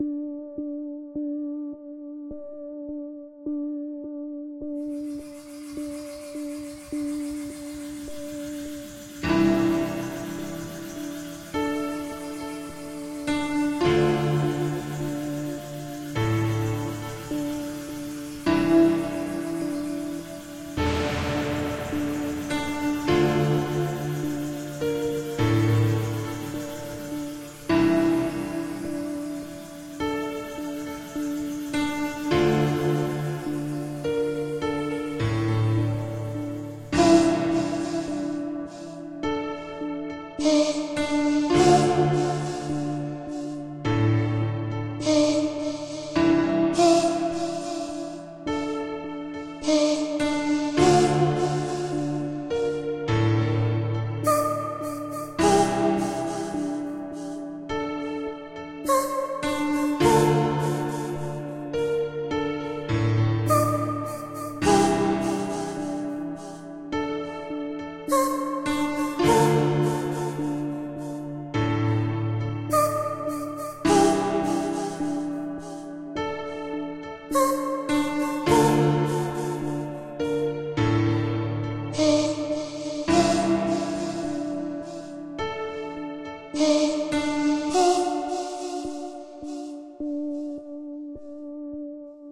Sadnes piano vox.
edited in Ableton live,Kontakt synth.